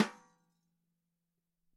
Drums Hit With Whisk
Hit, Drums, With, Whisk